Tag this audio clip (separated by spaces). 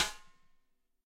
Snare Ludwig Drum Shot Rim